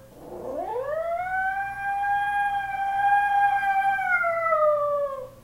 A 7 pound chinese crested howling, slow it down for an air raid sound, have fun!
raid, dog, howel, air